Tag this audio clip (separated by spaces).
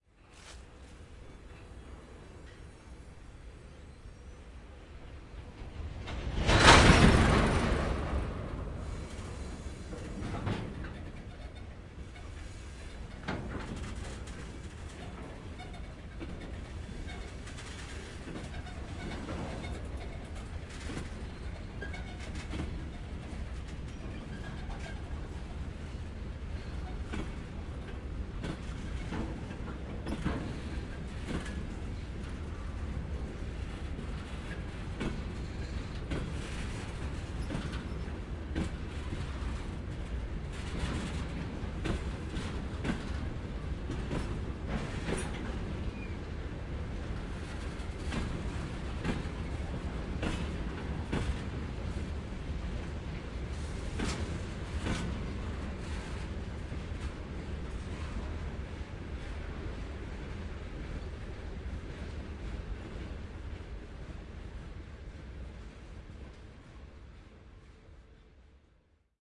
field-recording mechanical train